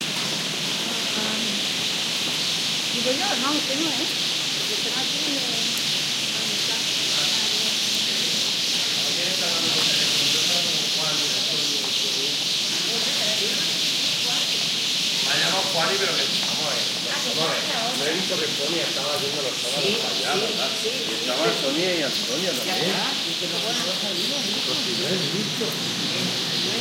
20061022.fig.tree
ambiance at dusk below a giant Ficus tree with hundreds of birds (house sparrows) roosting. Voices talking in Spanish get closer by the right. The tree is in a very populated and noisy street, so recording 20 s of relative quietness was a bit of a miracle. Soundman OKM into Sony MD / sonido de gorriones en un dormidero en un Ficus. Se acercan voces por la derecha.
binaural, nature, birds, spanish, sparrow, house-sparrow